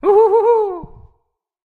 Funny Cheering Shout (3)
A funny cheery sound of a creature that had success / was rescued